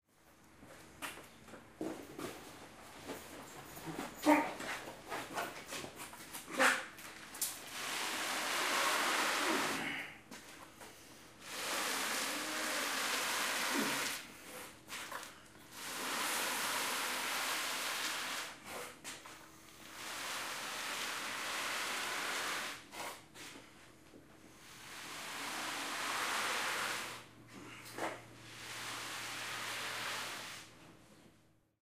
Dragging a body across a concrete floor
Somebody grunts as they drag a dead body across a concrete floor.
Hear all of my packs here.
field-recording, murderer, killer, scrape, dead-body, staged, foley-sound, floor, cadaver, assassin, ground, scraping, body, drag, dragging, crime-scene, grunt, heavy, corpse, coverup, hitman, crimescene, basement, foley, true-crime